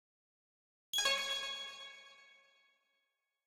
A Computer/game notification sound
computer, ping, game